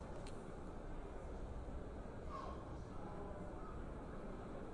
DFW Airport2
Another quiet moment in the airport gate
2, dfw